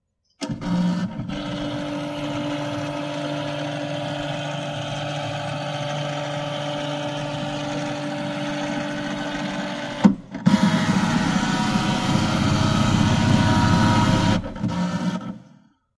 scanner NR
Recording of my HP Scanner. processed with Adobe Audition to remove some of the noise (worked quite well IMO)
gritty, noise, electric, household, processed, machine